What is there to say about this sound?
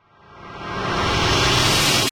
High quality whoosh sound. Ideal for film, TV, amateur production, video games and music.
Named from 00 - 32 (there are just too many to name)